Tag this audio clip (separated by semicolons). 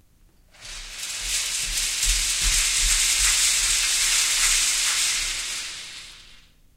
metal; scrim